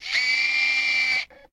digital camera, startup